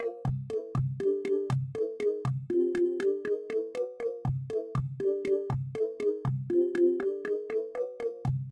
Muster Loop 4
120bpm. Created with Reason 7